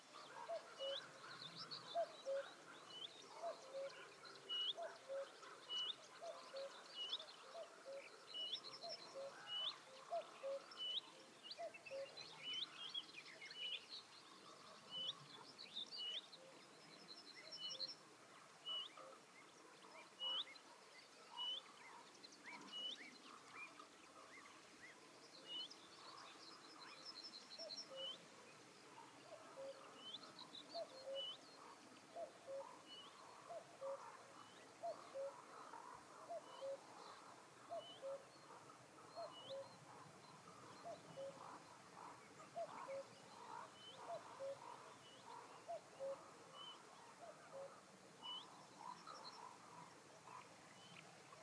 Meadow Cuckoo In Background And Birds High Pitch Mono Loop
This ambient sound effect was recorded with high quality sound equipment and comes from a sound library called Summer Ambients which is pack of 92 audio files with a total length of 157 minutes.
atmo, atmosphere, birds, cuckoo, meadow, reed, single, swamp, water